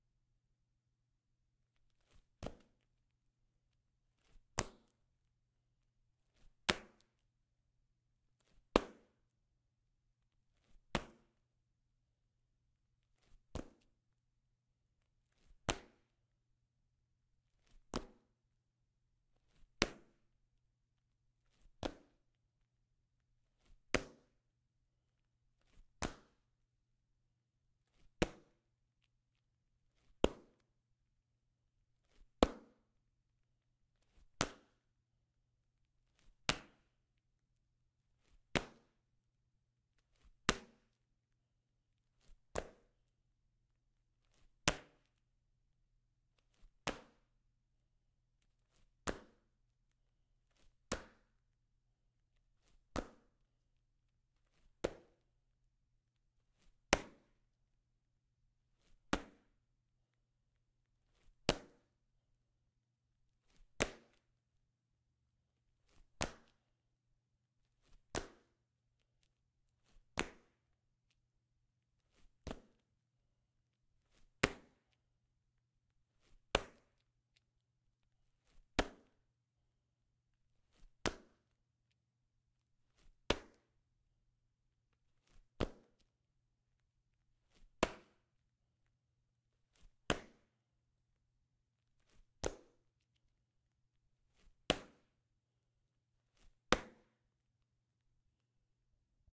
LANDING ON TILE
This sound I record with Zoom H6. I recorded a landing on the tile
Foley, Land, Landing, LandingOnTile, Tile, TileLanding